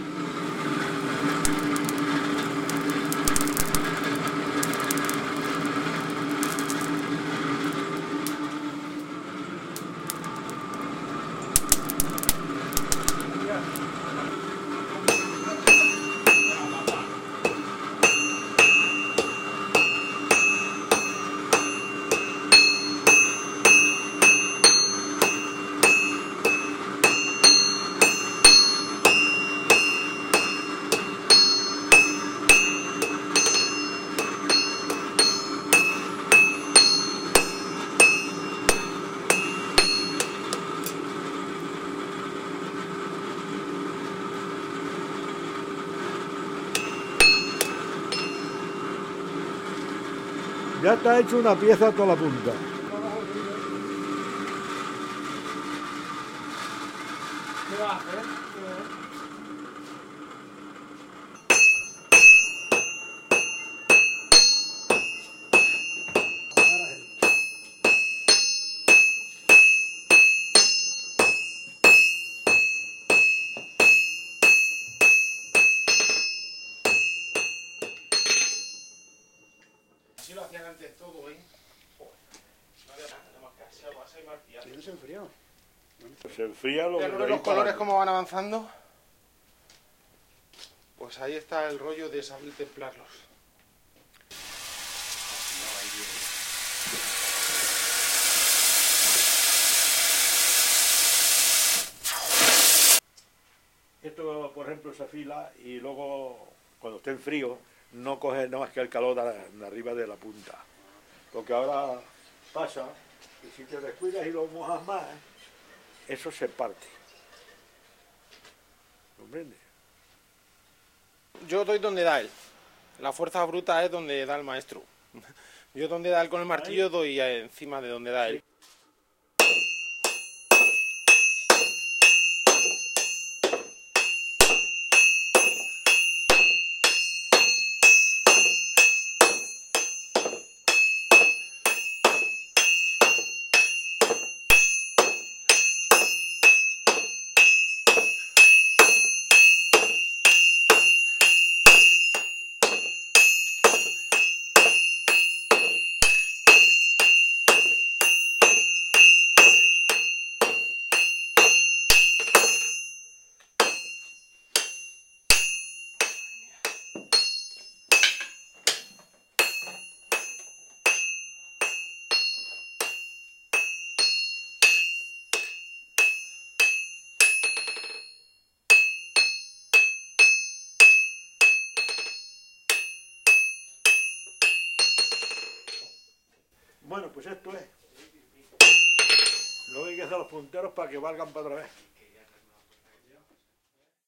Herrero :: Blacksmith
En el taller de herrería Julian Gil Blázquez y su hijo.
El sonido de la fragua, forja con martillos en el yunque, templado del metal y comentarios.
At the blacksmith shop Julian Gil Blázquez and his son.
Grabado/recorded 10/12/14